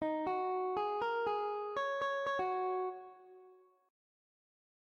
Game Over
Game, Over